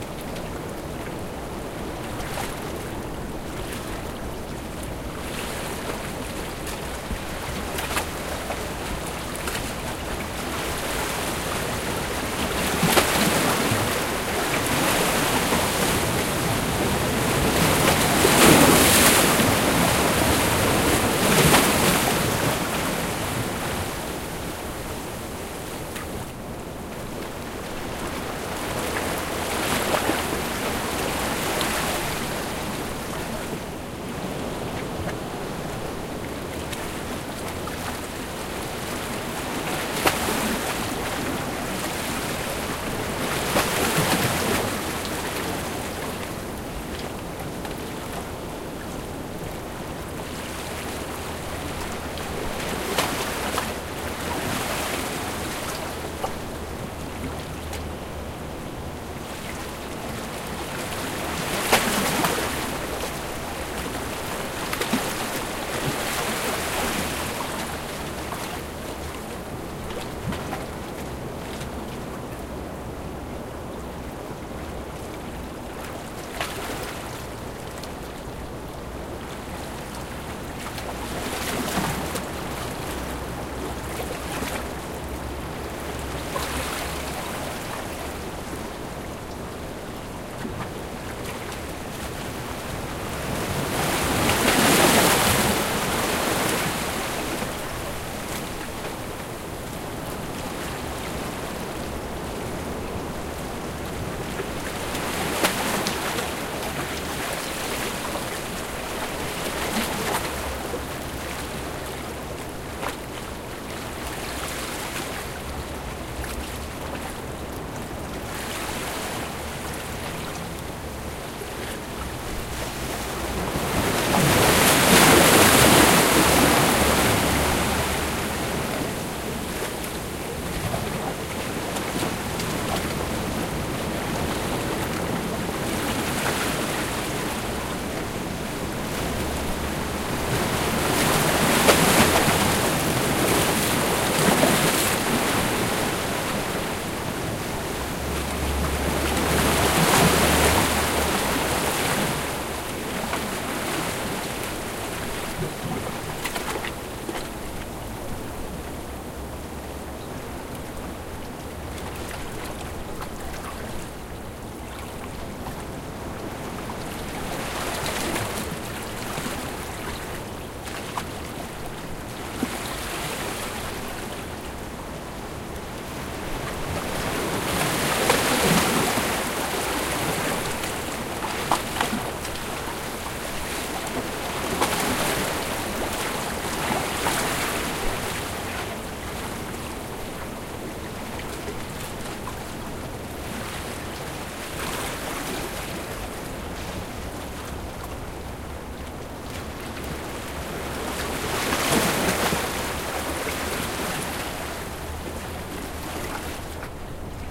Mar sobre las piedras escollera
Olas de mar pasando por entre las piedras, tomado con el micrófono desde arriba.
Sea waves passing through the stones, recorded from above.